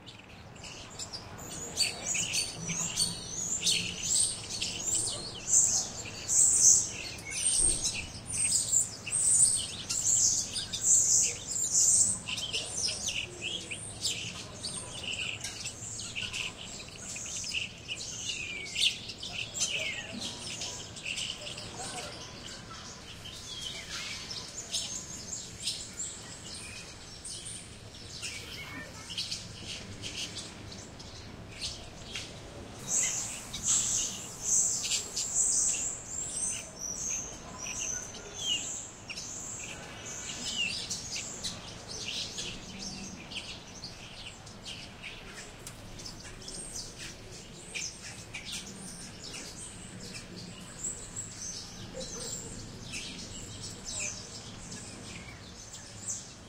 Birds Chirping on a Tree 2
Recorded the birds chirping on the tree just outside my window, cleaned it as best as I could but still some city sounds (construction, planes etc.) is audible.
Bird, Birds, Chirping, City, Environmental, field-recording